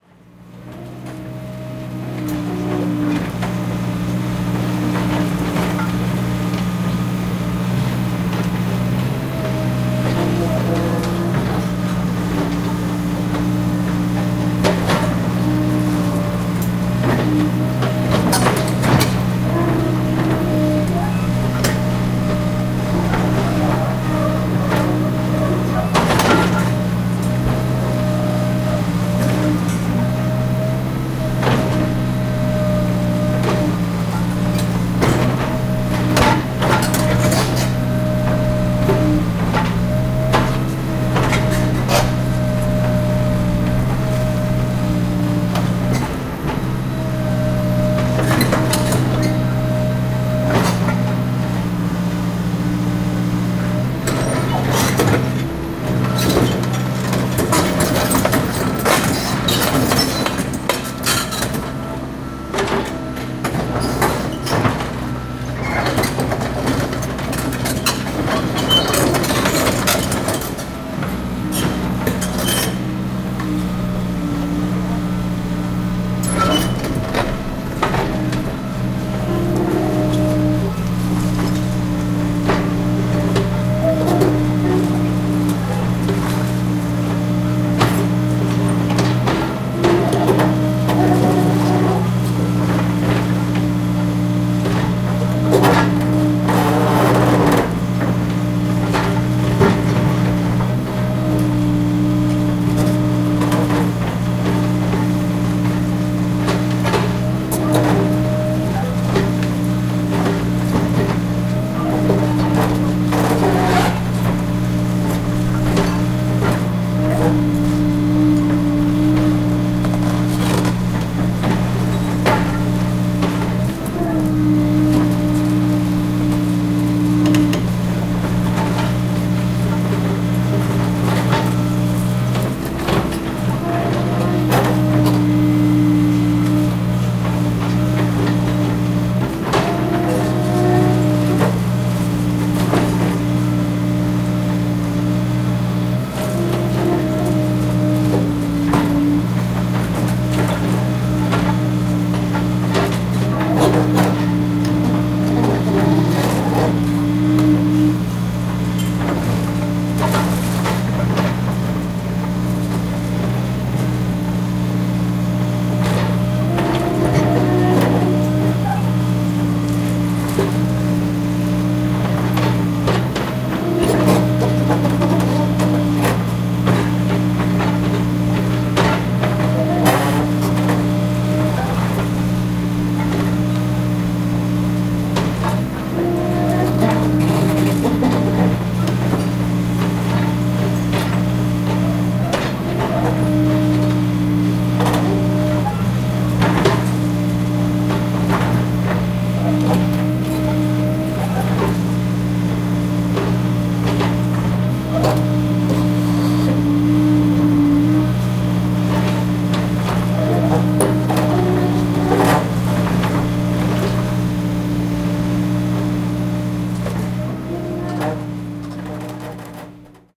Field recording of an excavator beeing used on a demolition plant. Recorded with a zoom h2n in M/S stereo mode (120° setting)
crush, demolition, field-recording, heavy-machinery